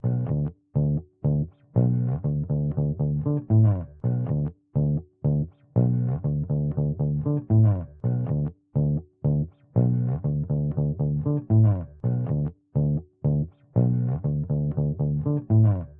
Bass loops 014 short loop 120 bpm
dance, onlybass, hip, bpm, beat, 120bpm, 120, rhythm, groovy, bass, drum-loop, funky, hop, drum, percs, groove, drums, loops, loop